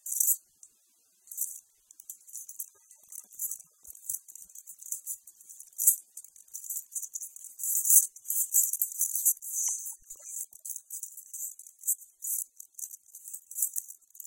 jangly ode
jingling keys while blowing into a bottle
harmonics, metal-keys